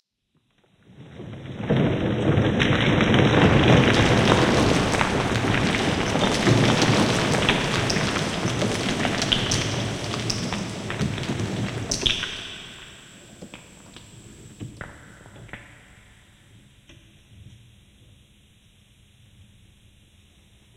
Originally done for use in a play.
avalanche, wheelbarrow, gravel, rocks